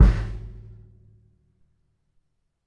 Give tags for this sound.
bass
kick
kit
live
tama

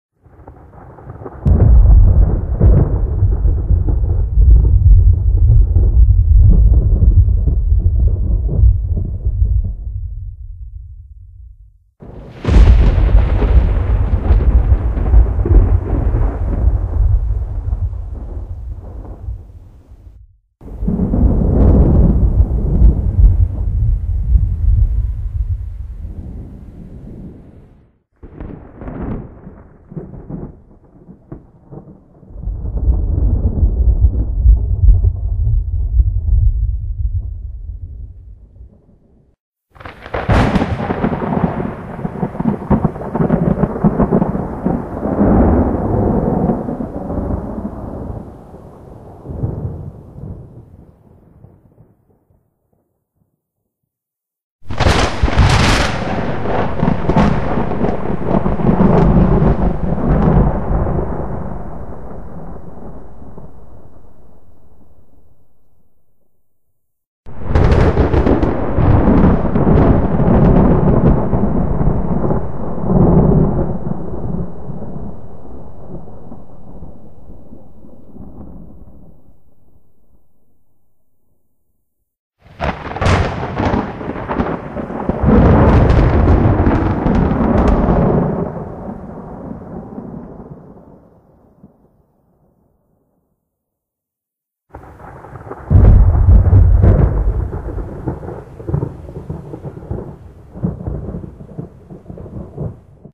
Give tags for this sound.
thunder-claps
thunder-clap

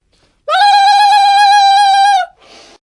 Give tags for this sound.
crazy
western
indians
scream
666moviescreams

